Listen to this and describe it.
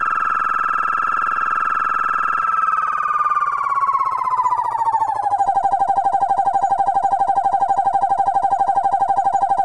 AUDACITY
For left channel:
- Cut silence before (0.000s to 0.046s), middle (0.096 to 0.228), and after (0.301 to 0.449) sound
- Cut middle part 0.130 to 0.600
- Effect→Change Speed
Speed Multiplier: 0.800
Percent Change: –20.000
- Effect→Equalization
(18 dB; 20 Hz)
(18 dB; 800 Hz)
(–18 dB; 2000 Hz
(–26 dB; 11 000 Hz)
- Effect→Repeat…
Number of repeats add: 150 (50 for fly, 50 for stop fly, 50 for hover)
Select repeats 50 to 100 (4.108s - 8.215s)
- Effect→Sliding Time Scale/Pitch Shift
Initial Temp Change: 70%
Final Tempo Change: 0%
Initial Pitch Shift: 70%
Final Pitch Shift: 0%
Select repeats 1 to 50 (0.000s - 4.108s):
- Effect→Change Speed
Speed Multiplier: 1.700
Percent Change: 70.0000
For right channel:
- Tracks→Add New→Mono Track
- Copy left track and paste at 0.010 s